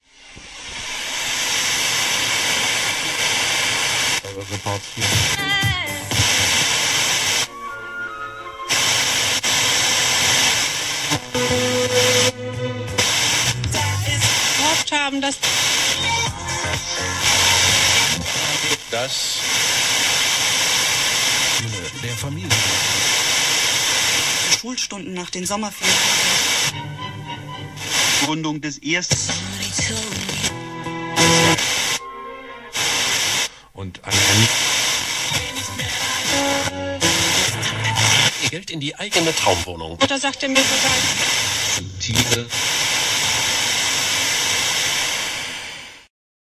searching a radio channel on an old radio
Radio, old-radio, Sough